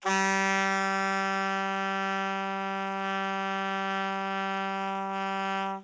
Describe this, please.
The G3 note played on an alto sax